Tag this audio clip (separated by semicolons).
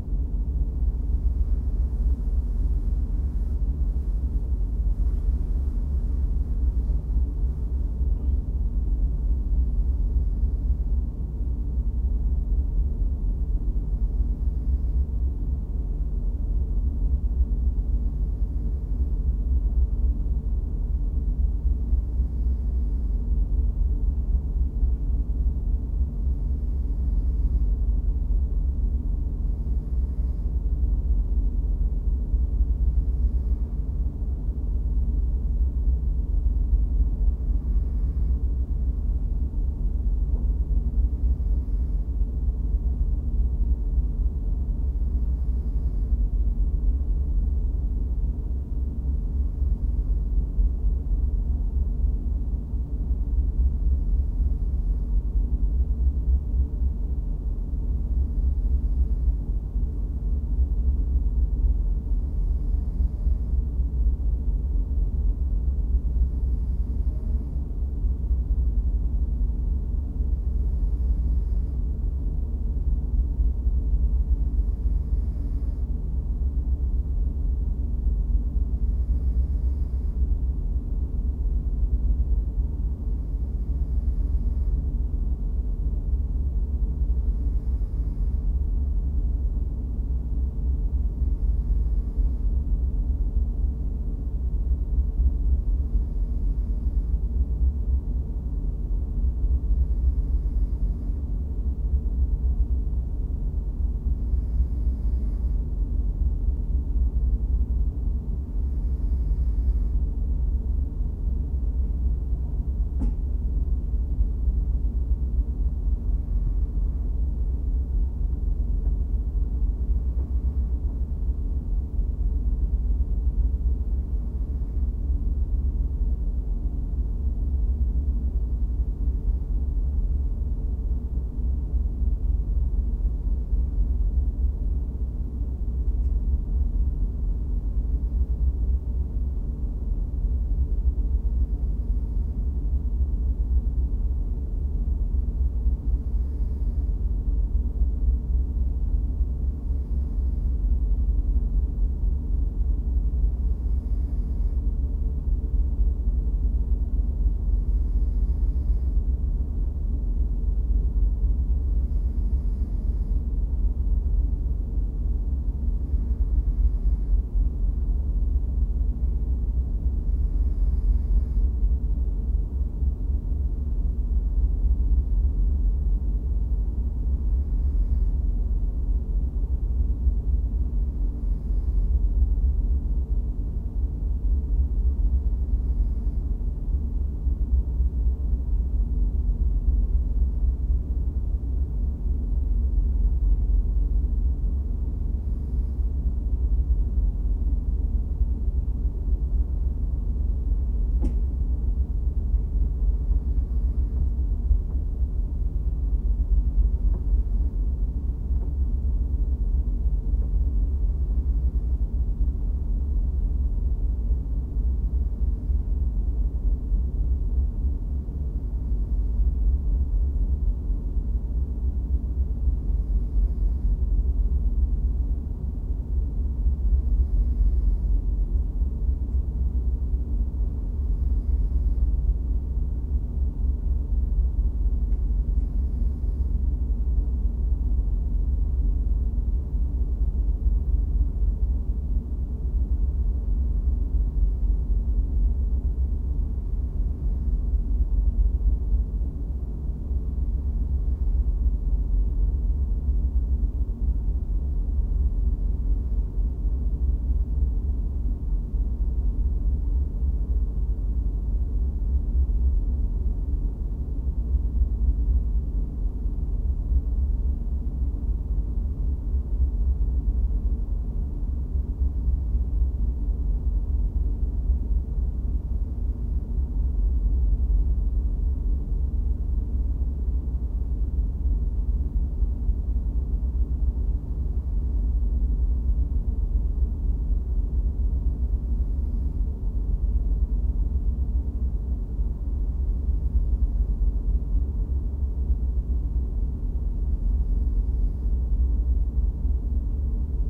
motor; norway